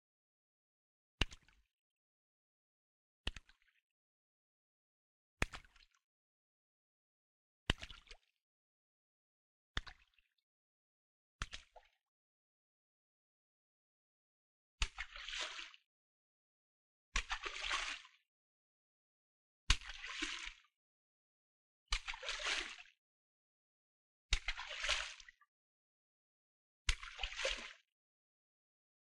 Water Splashes
That's the recording of hitting water surface with a stick. There are weaker as well as stronger hits present. Have fun! ;D